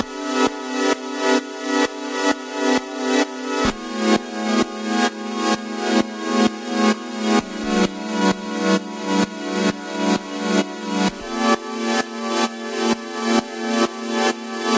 square pad wave made in fl studio